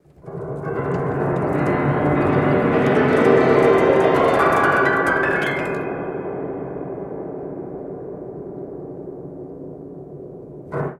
Detuned Piano Upwards 5
series of broken piano recordings made with zoom h4n
anxious, detuned, dramatic, eery, haunted, horror, macabre, out-of-tune